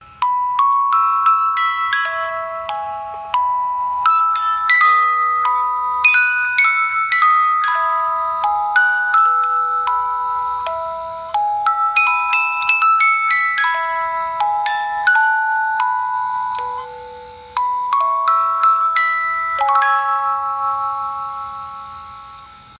Anniversary Vintage Music Box (Perfect Loop)
This music box was an anniversary present to my grandmother and has been in the family for years. This is an edited version of a recording so that the song plays once and loops perfectly. I don't know the tempo but I know it's in E major. I also have a sound in the same pack where I wind it up and let it play until it stops.
E, musicbox, present, vintage, toy, music, whirr, antique, piano, loop, song, box, music-box, mechanical, anniversary, kalimba, sample, perfect, old